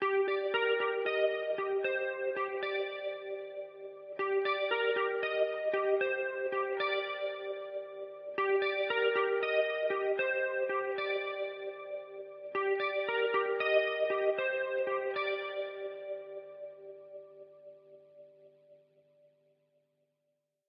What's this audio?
plucked TheCureish
Guitar in G minor. Somewhat reminds me of Robert Smith (The Cure, Siouxsie and the Banshees). It's the flanger you see. Vox-like amp, flanger, tremolo, reverb. 115 bpm.
chord, flanger, guitar, loop, melodic, music, plucked, processed